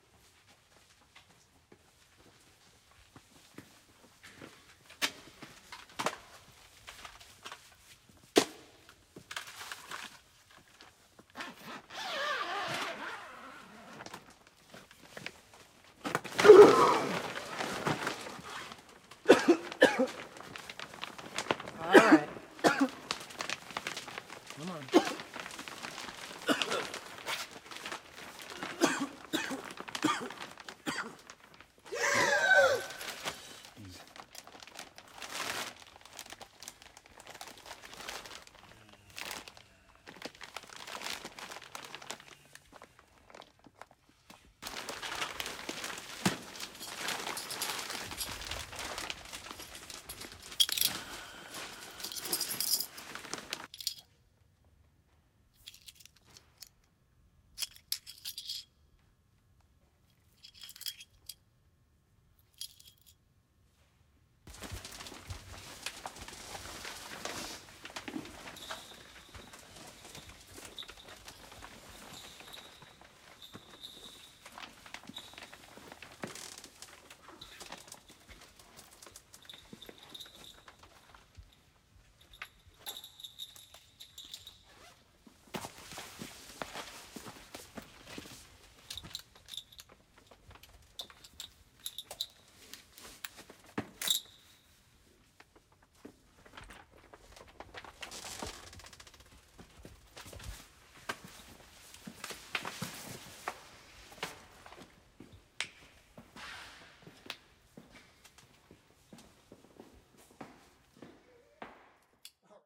The foley of a scene. There is some talking but it's mostly rustling, clinking of belts and dogtags, some coughing.